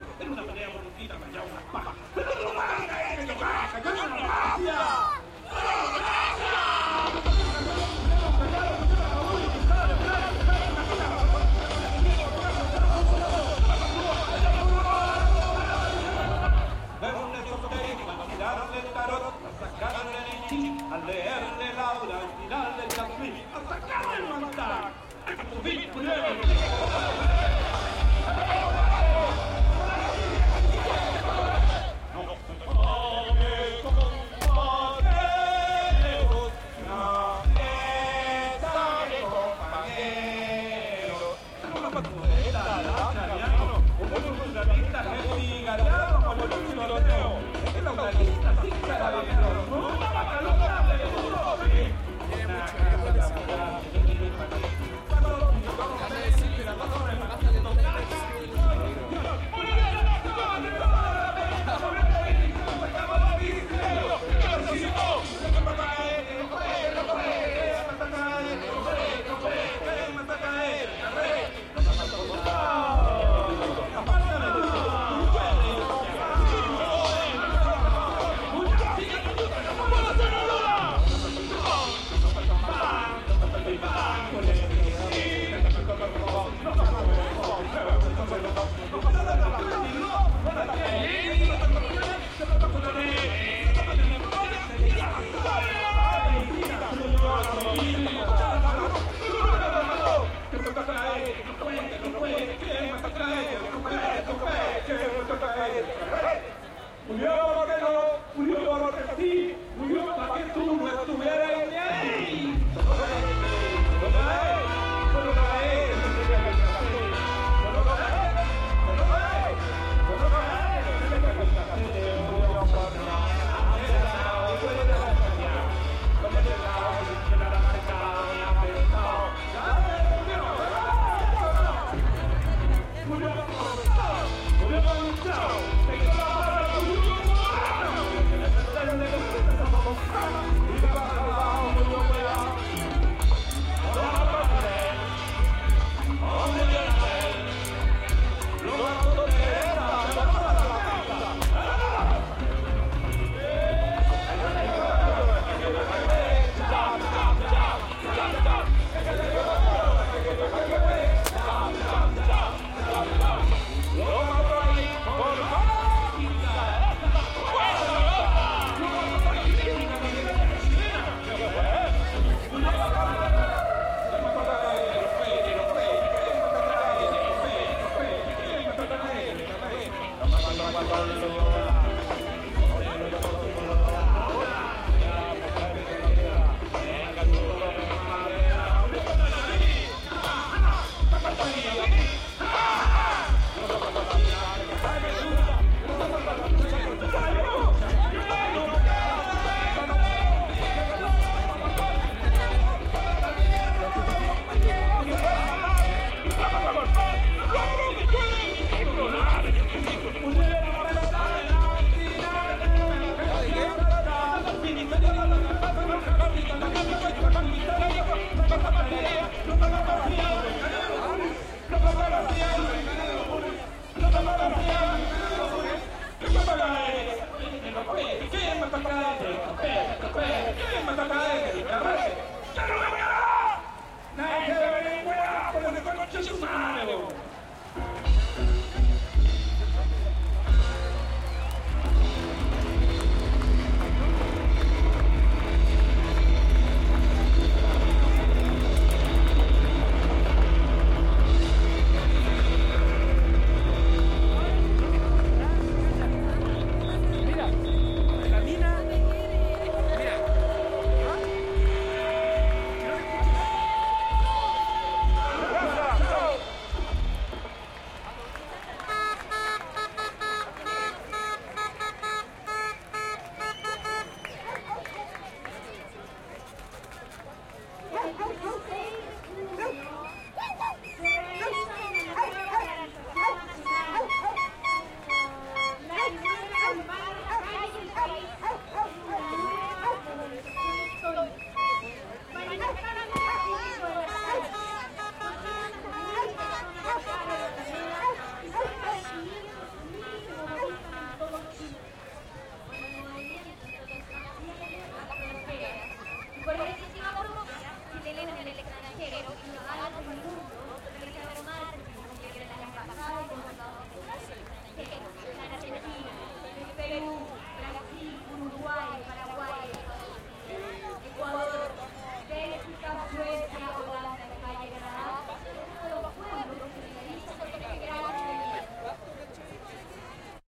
domingo familiar por la educacion 01 - quien mato a gaete
quien mato a gaete - mauricio redoles